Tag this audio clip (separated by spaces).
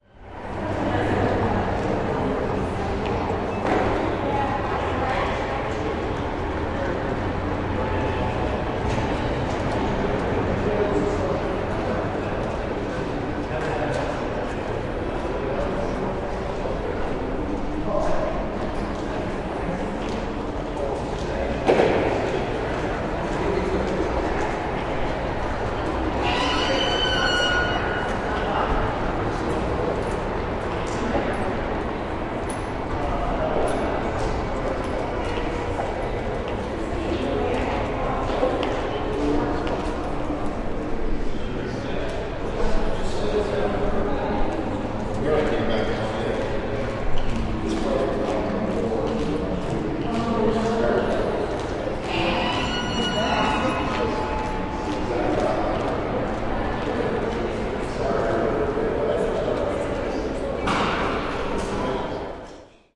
austin building field-recording office texas lobby city ambience tower block